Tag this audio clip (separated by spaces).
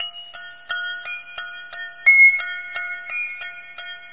bell clarion loop ring telephone